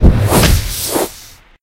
Magic Spell
cast, casting, dink, freedink, magic, magical, spell, spellcaster, video-game, videogame